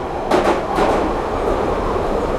London underground 12 train knocking sound
Knocking sound of passing a switch, recorded inside a London Underground train.
london-underground, train